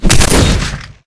heavy punch
A cartoonish punch impact sound.
anime, cartoon, crunch, punch, splat